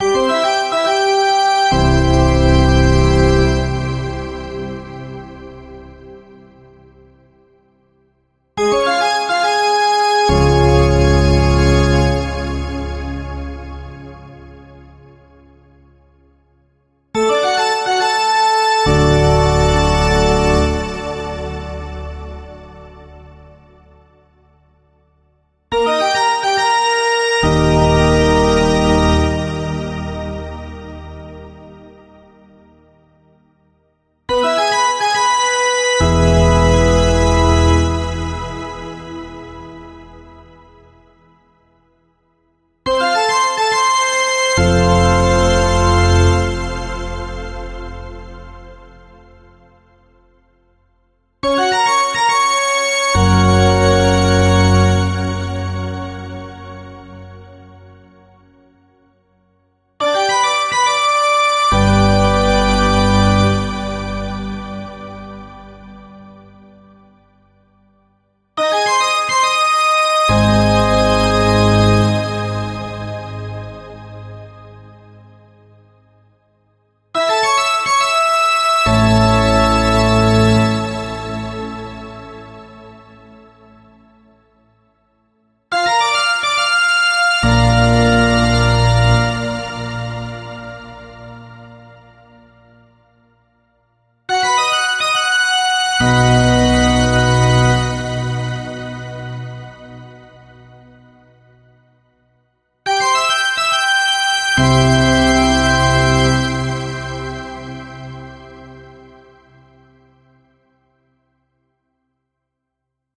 Hockey fanfare 2b
A crowd energizer that is played in down times during hockey, baseball and other sports. Recorded over the semitones in 1 octave. This one is played by another pipe organ